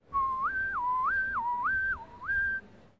AmCS RG TI07 woeieoeieoeie
Sound collected at Amsterdam Central Station as part of the Genetic Choir's Loop-Copy-Mutate project
Amsterdam, Central-Station, Time